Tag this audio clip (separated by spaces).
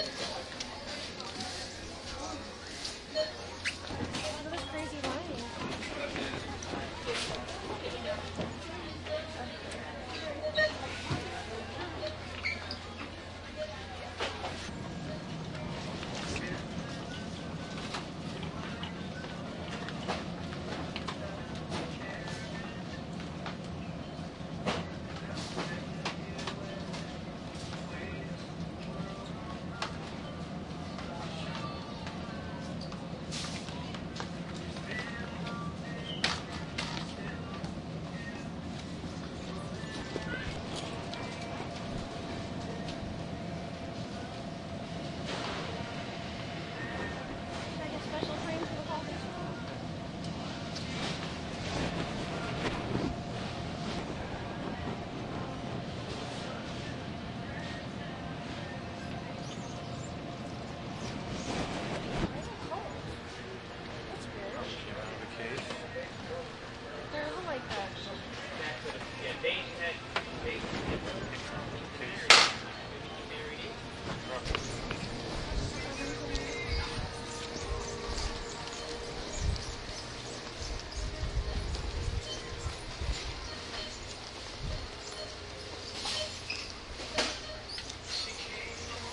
grocery ambience field-recording shopping